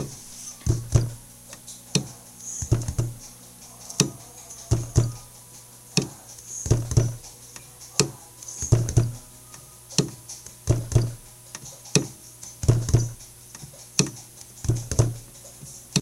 SUMTHIN Pt 3 Percussion
A collection of samples/loops intended for personal and commercial music production. For use
All compositions where written and performed by
Chris S. Bacon on Home Sick Recordings. Take things, shake things, make things.
percussion, synth, loops, acoustic-guitar, loop, samples, vocal-loops, free, Indie-folk, original-music, harmony, sounds, guitar, melody, voice, beat, whistle, Folk, piano, drum-beat, acapella, bass, rock, indie, drums, looping